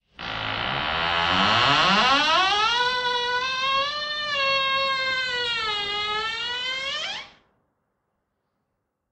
Heavy Door Squeak
A sound recording of a large door making a squeaking noise when opening
recorded with Sony HDR PJ260V then edited with Audacity
closing, door, gate, large, mansion, opening, scary, slow, slowly, squeak, squeaking, squeaky